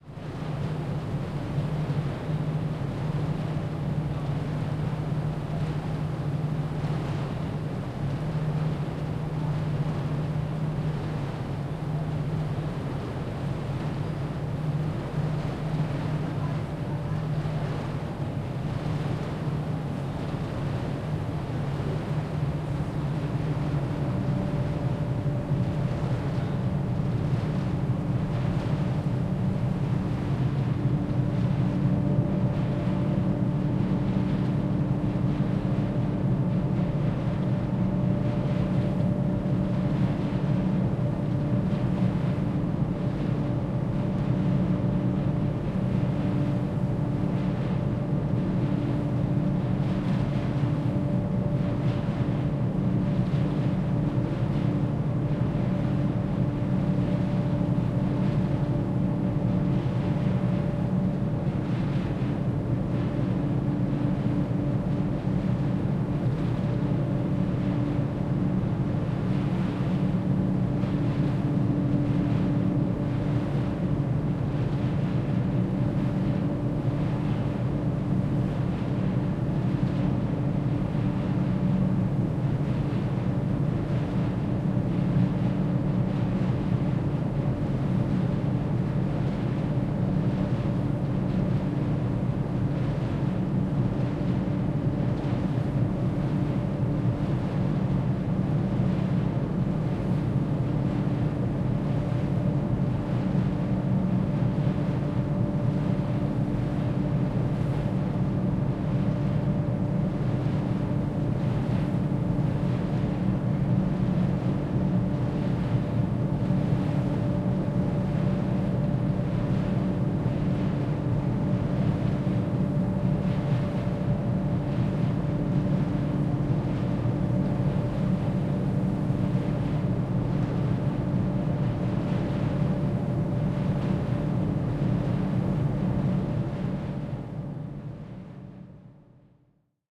Ferry Boat Ventilation
Ventilation engine of a ferry boat
boat, diesel, ferry, Ferry-boat, rumble, ship, ventilation